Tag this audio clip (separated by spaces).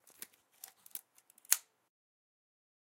canon slr film loading 35mm 8mm owi camera